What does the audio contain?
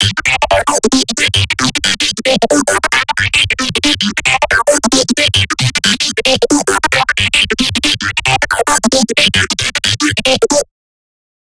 Sylenth 1 with some effects